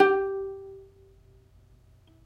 Notes from ukulele recorded in the shower close-miked with Sony-PCMD50. See my other sample packs for the room-mic version. The intention is to mix and match the two as you see fit.
These files are left raw and real. Watch out for a resonance around 300-330hz.
uke, ukulele, note, string